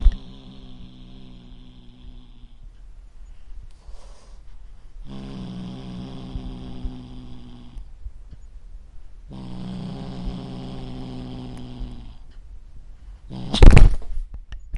Kitten Growl and Hiss
My kitten growling, hissing and clawing the microphone as I try to remove her catnip toy from her jaws.
cat, growl, hiss, Kitten